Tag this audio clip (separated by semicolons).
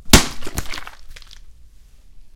bottle; hit; splash; water